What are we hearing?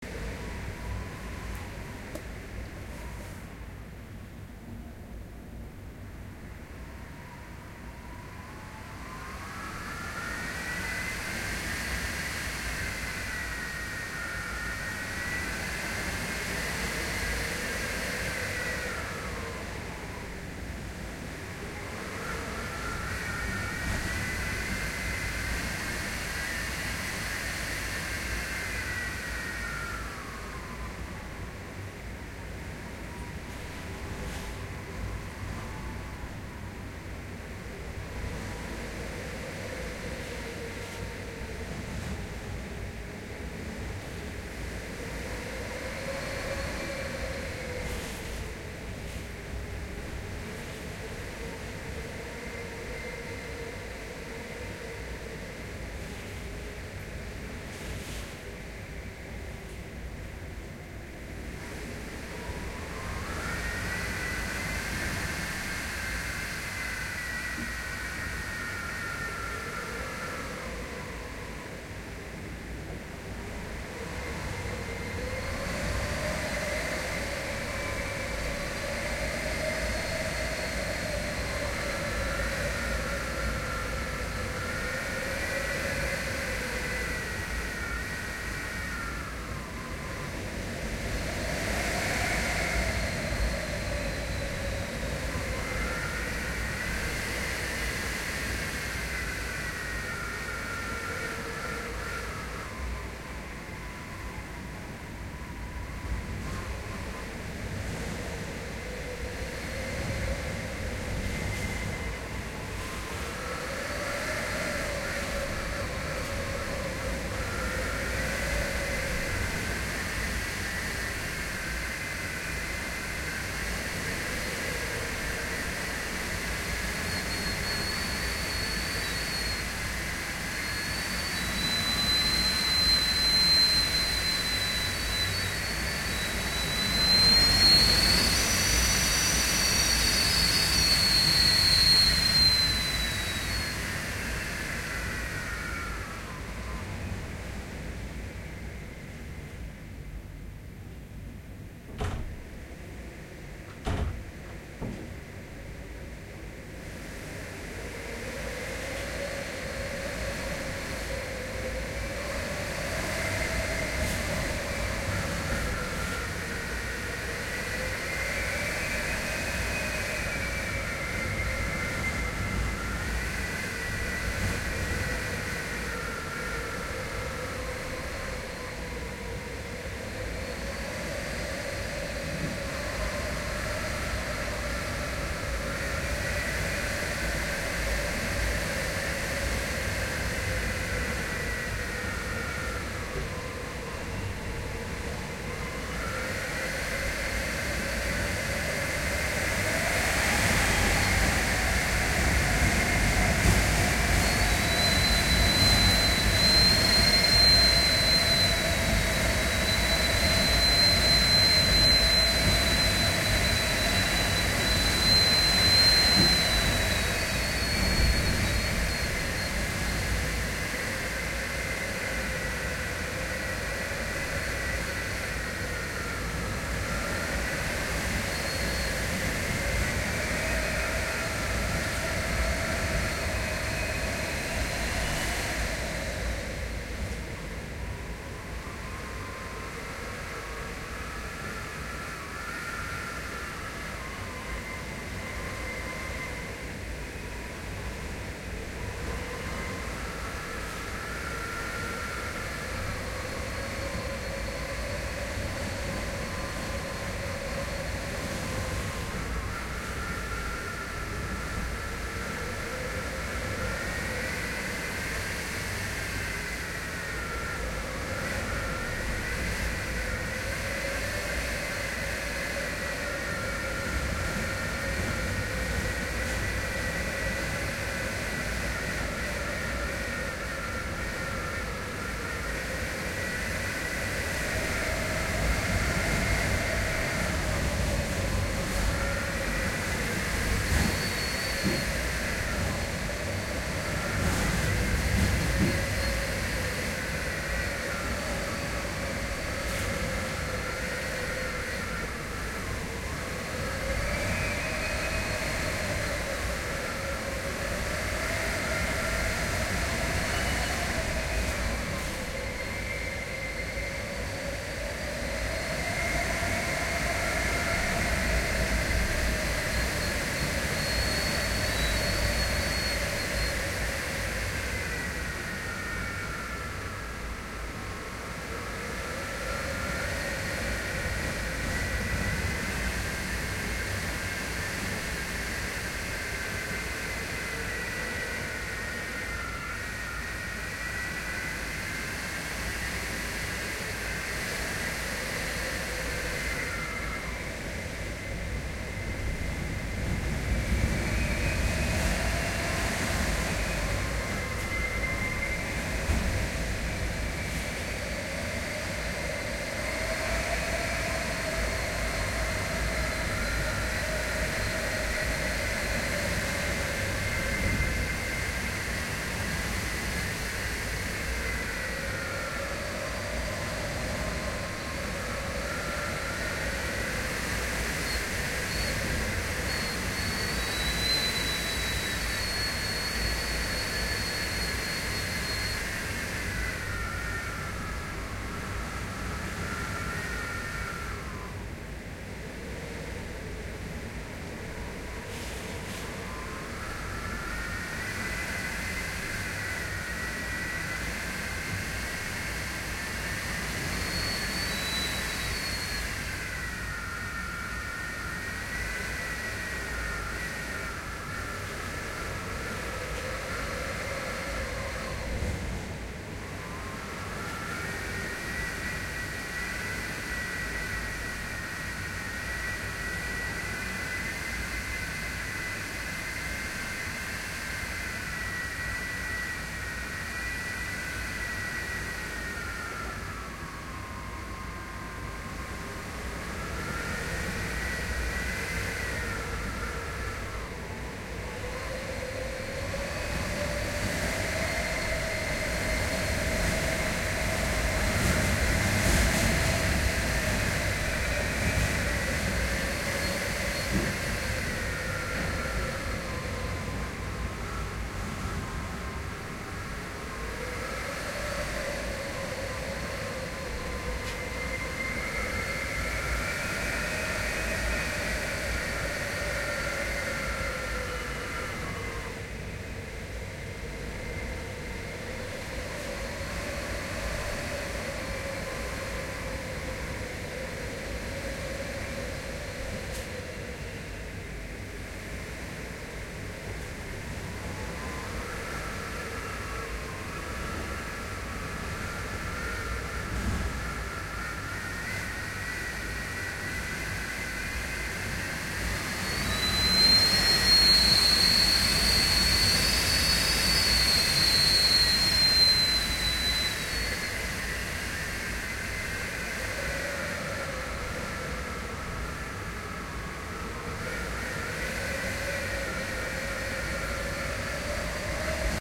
Typhoon no.10 strong eerie wind Typhoon Mangkhut
Stereo recording of the eerie strong wind under Typhoon no.10 of Typhoon Mangkhut. When they wind passed through the gap of my windows, it produced some high pitch eerie sound. The recording date is 16 Sep 2018. Recorded in Hong Kong. Recorded on iPhone SE with Zoom iQ5 and HandyRec.
10, eerie, field-recording, Hong-Kong-Sound, Strong-Wind, Typhoon-Mangkhut, Typhoon-no